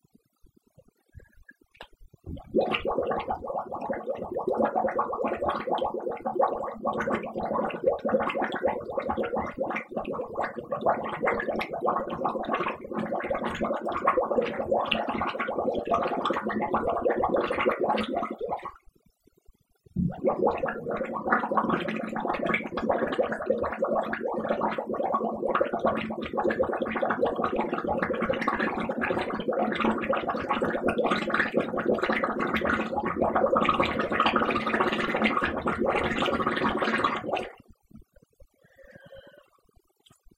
Two long bubble blowing sounds I recorded (quite obviously) in my bathtub.
pop, gurgle, bubbles, bathtub, effect, sound, water, bubble